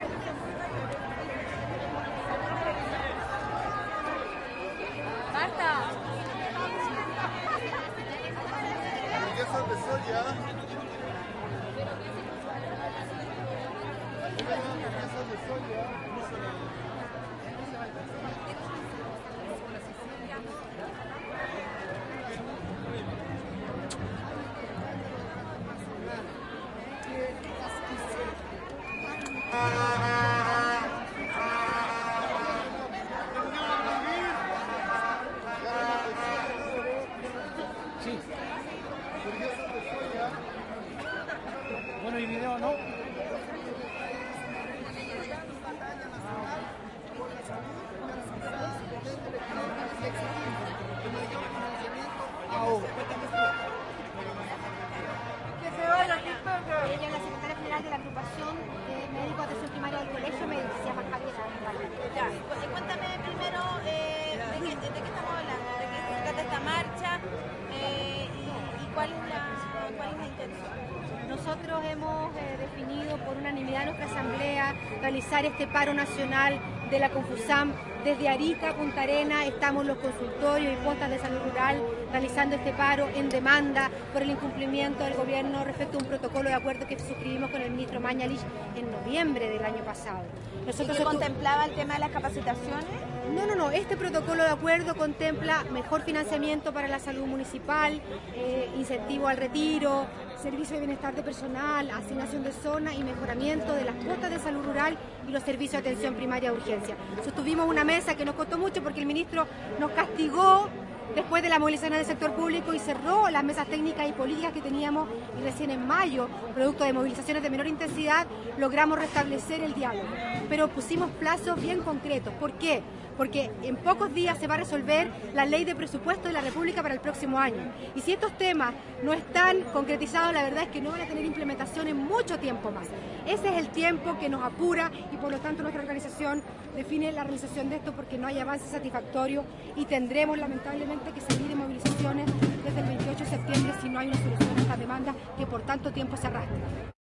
paro salud confusam 02 - Ambiente postmarcha y fundamentos
Conversaciones varias entre vendedores de hamburguesas de soya. Secretaria general de la agrupacion Javiera Corvalán explica los motivos y demandas de la movilización.